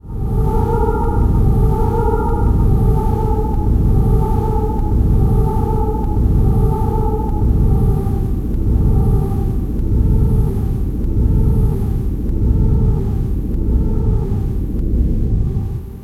Funky [bird 2]
This echo, reverse, and inverted bird song creates a repetitive, slowing effect.